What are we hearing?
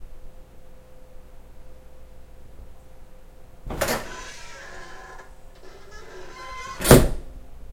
opening a door